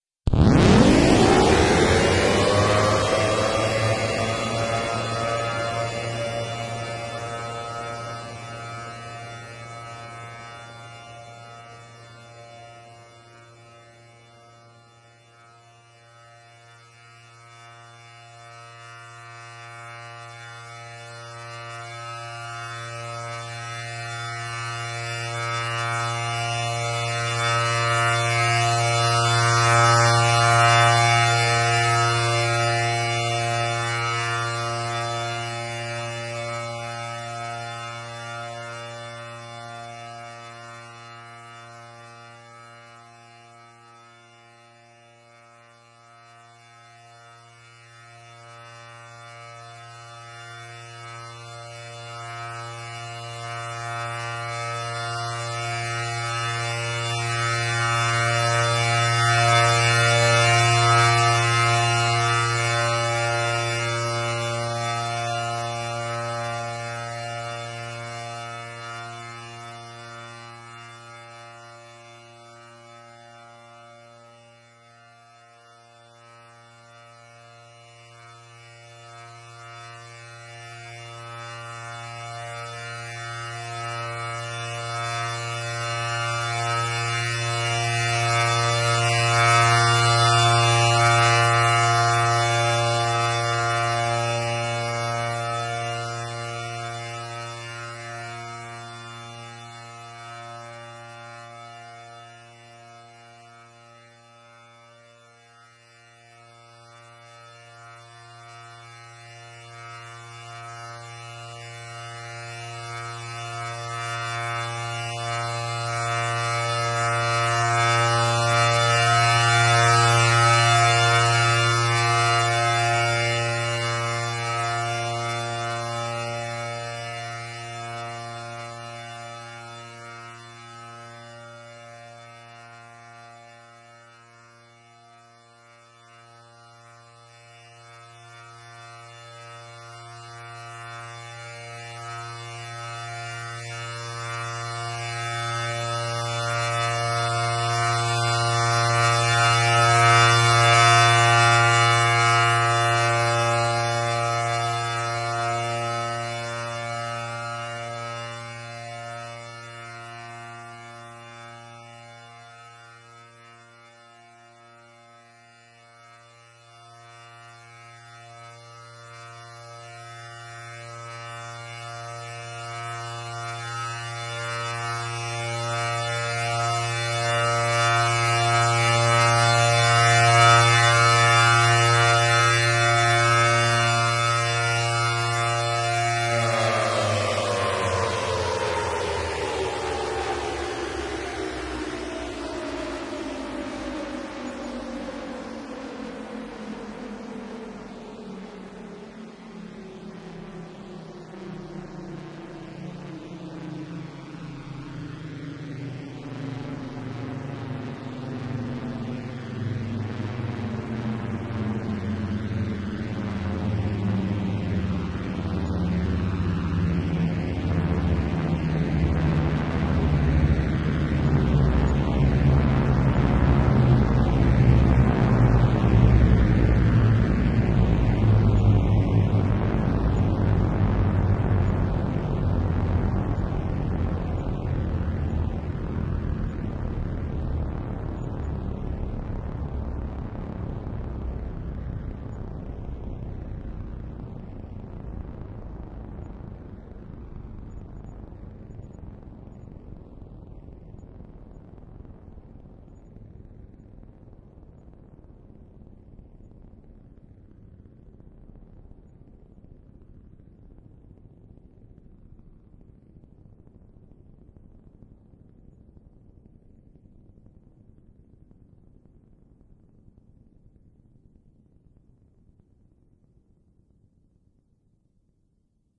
500AT 10/12 Port
A Synth of a 500AT Siren 10/12 Port, this consists of only Sawtooth & Triangle Waves.
Alert, Siren, 500, Port, 1012